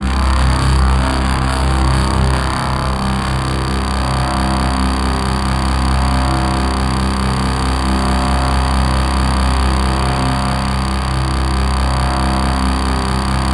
Binaural Ringmod Texture from Reason Subtractor and Thor Synths mixed in Logic. 37 samples, in minor 3rds, C-1 to C8, looped in Redmatica's Keymap. Sample root notes embedded in sample data.
Ringmod, Synth, Texture